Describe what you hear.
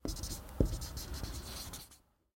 Writing on a whiteboard.